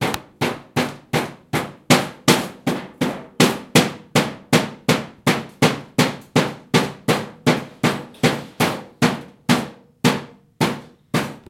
Metal Banging
Bang, Crash, Hit, Tools